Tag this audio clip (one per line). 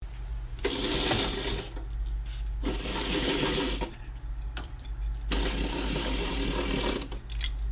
blinds
open
roller
slide
sound
window